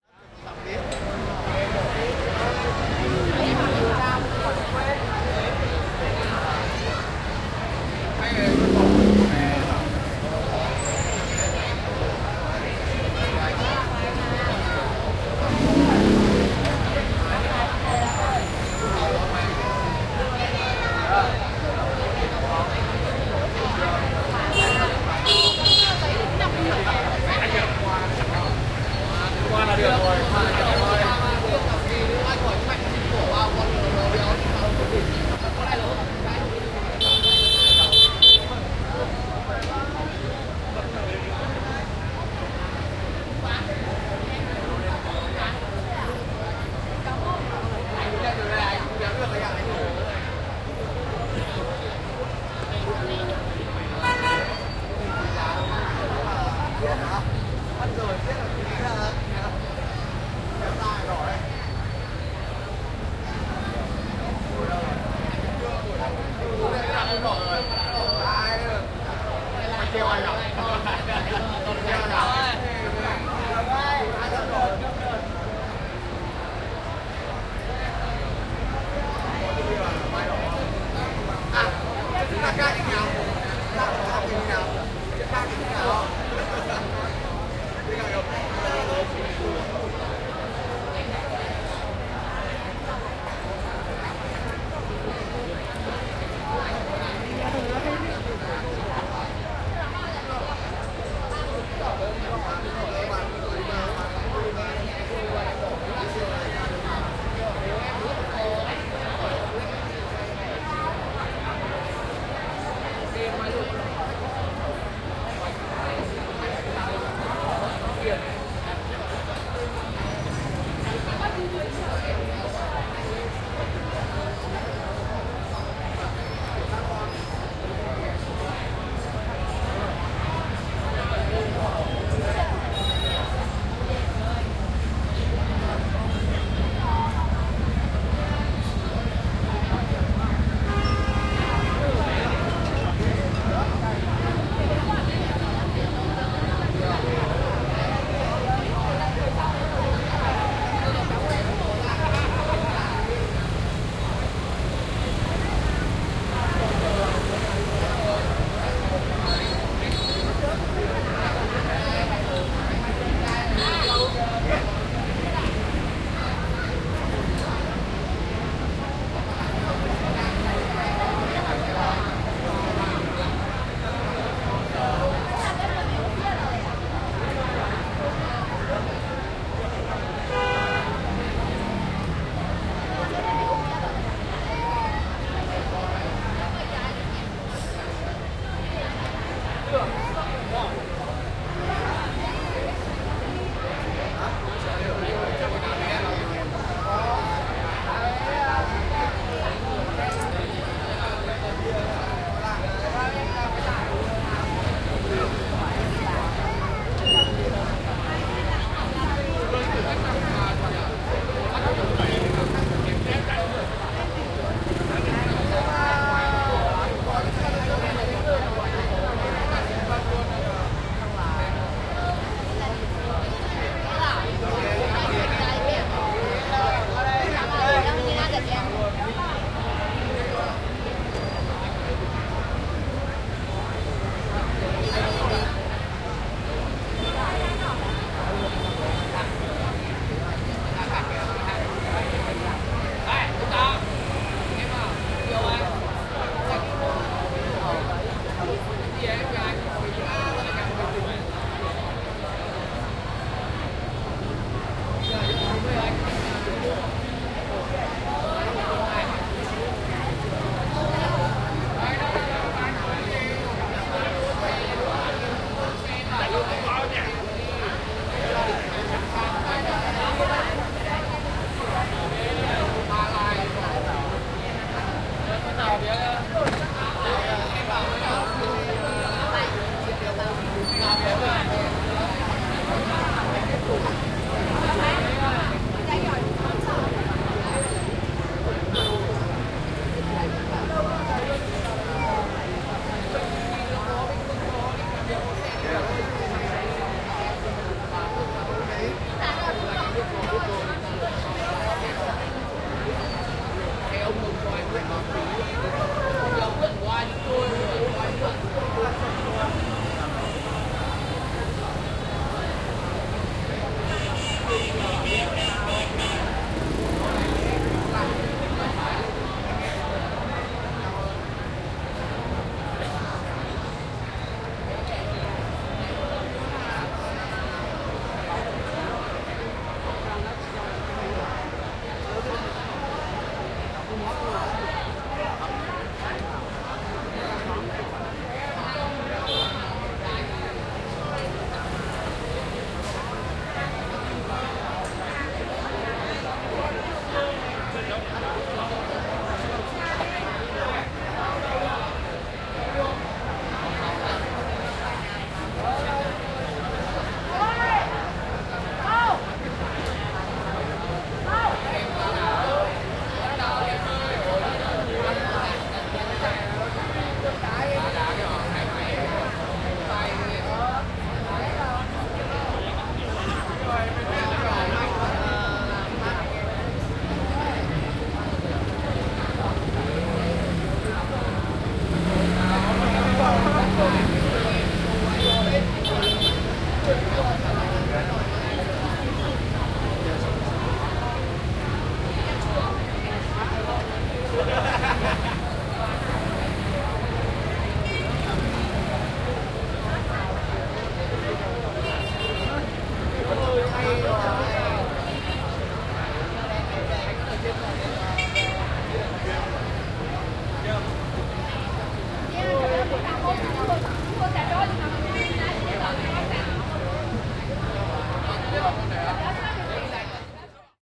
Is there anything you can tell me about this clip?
SEA 16 Vietnam, Hanoi, Street atmo at Steet restaurant (binaural)
Street ambience in the old quarter of Hanoi / Vietnam in the evening. Dense atmosphere, busy, many people talking (asian languages), traffic passing by, honking.
Binaural recording.
Date / Time: 2017, Jan. 23 / 21h27m
binaural, asia, people, field-recording, traffic, street-ambience, city, hanoi, ambience, vietnam